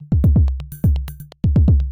Rhythmmakerloop 125 bpm-54
This is a pure electro drumloop at 125 bpm and 1 measure 4/4 long. A more minimal variation of loop 53 with the same name. It is part of the "Rhythmmaker pack 125 bpm" sample pack and was created using the Rhythmmaker ensemble within Native Instruments Reaktor. Mastering (EQ, Stereo Enhancer, Multi-Band expand/compress/limit, dither, fades at start and/or end) done within Wavelab.
electro, 125-bpm, drumloop